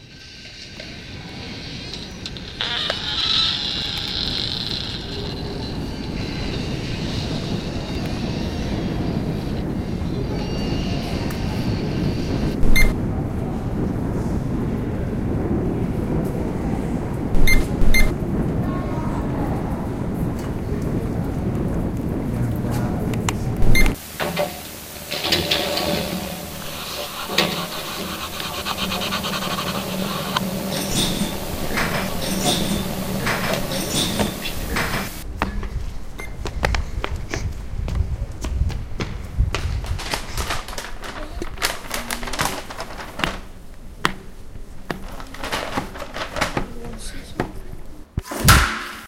Caçadors de sons - El tren de Joan Miró
A workshop in which we are introduced to some tools and methodologies of Sound art from the practice of field recording. The sounds have been recorded with portable recorders, some of them using special microphones such as contact and electromagnetic; the soundtrack has been edited in Audacity.